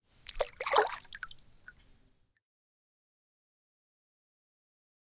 Water Splash in Lake 02
Splasing water in lake
Zoom H4N Pro + accusonus Noise Remover
liquid, dripping, drip, wet, splash, drop, gurgle